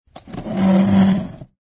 pulling a kitchen chair